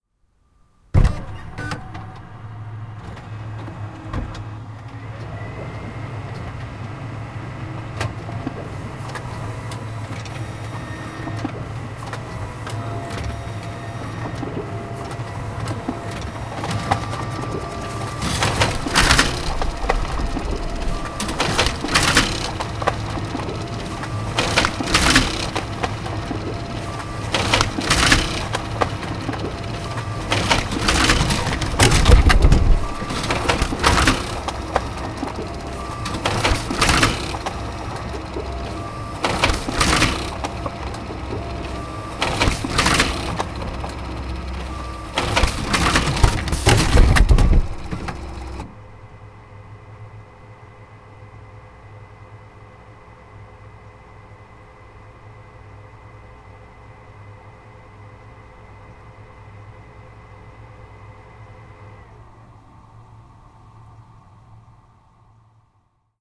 Printer, Very Close, A
A raw recording of a MultiXPress X7400GX printer. The recorder was placed on the output board where the paper leaves the machine. As such, it picks up the vibrations of the machine significantly, making it appear louder.
An example of how you might credit is by putting this in the description/credits:
The sound was recorded using a "H1 Zoom V2 recorder" on 22nd September 2016.